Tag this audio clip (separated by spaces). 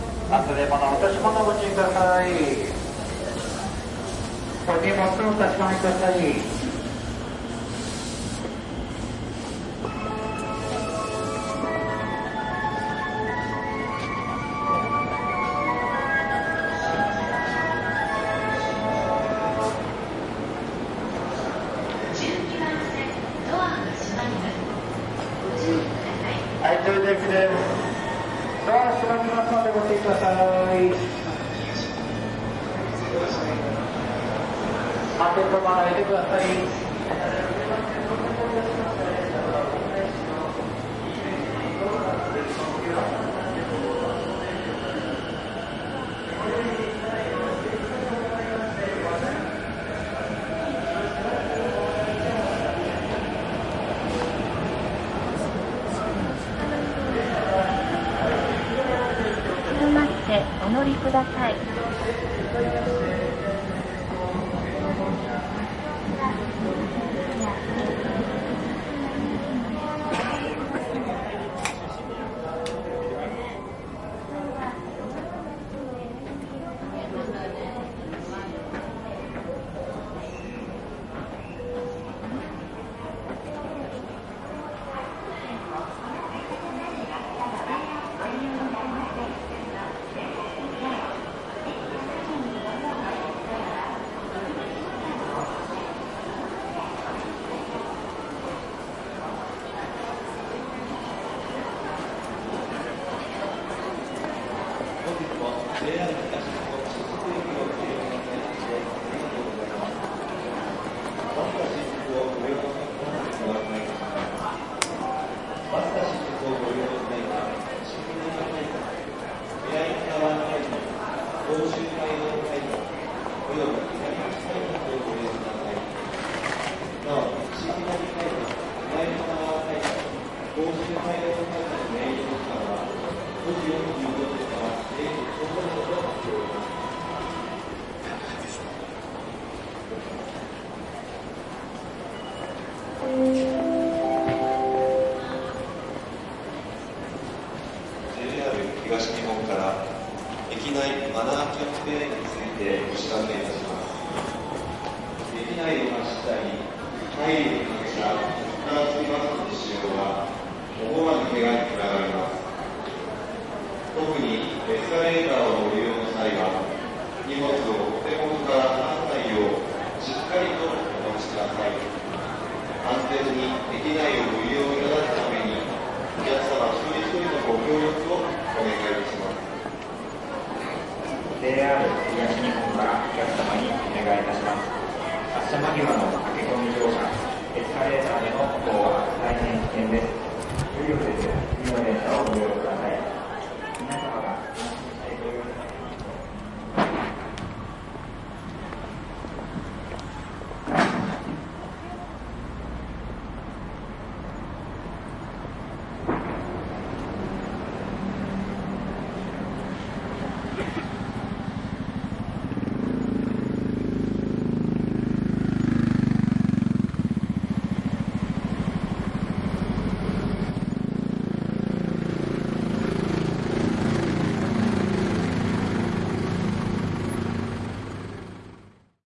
footsteps railway-station departure beeps transport train-tracks tram underground platform train-station public-transport railway arrival train metro depart station rail departing tube Tokyo subway announcement announcements train-ride field-recording Japan